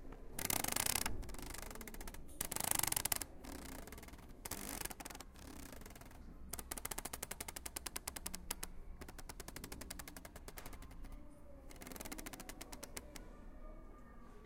mySound AMSP 21
Sounds from objects that are beloved to the participant pupils at the Ausiàs March school, Barcelona. The source of the sounds has to be guessed.
Barcelona, CityRings, AusiasMarch, mySound, Spain